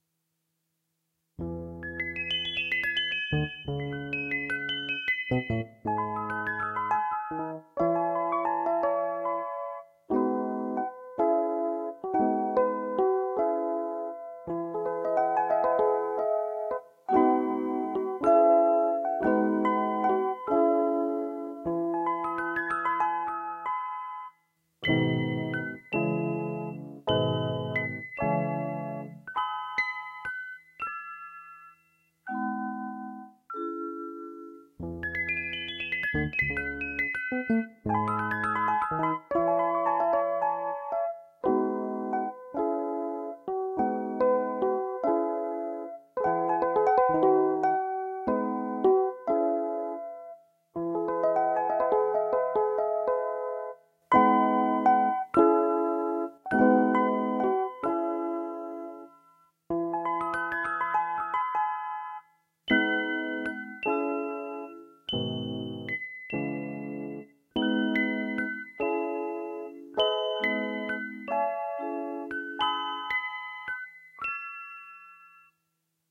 Background or interlude.
ambience, atmospheric, background, Bright, Child-like, high, Melodic, Movie, pattern, Playful, Repeating, Sweet